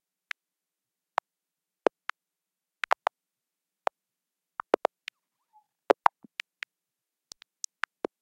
A really strange FM patch that I made on my Nord Modular, he really has a mind of his own. This one is sort of rhythmic.